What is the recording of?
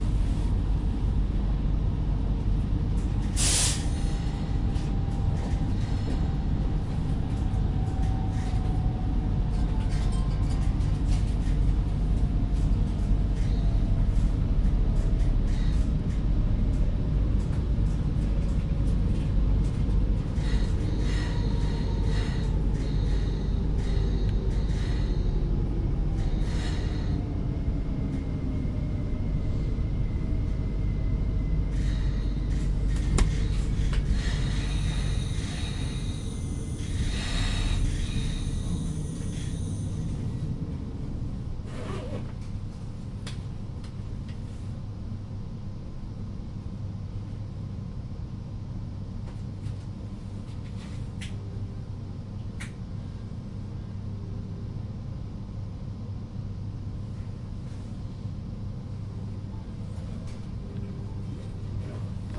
train, local train, interior, stops
interior - local train stops, recorded with zoom h2n and slightly edited with audacity. location: Riihimaki - Finland date: may 2015